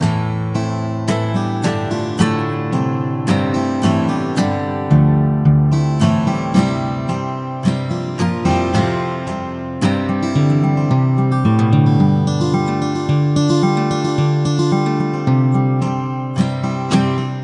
Short guitar improv for an iPhone project.
guitar, acoustic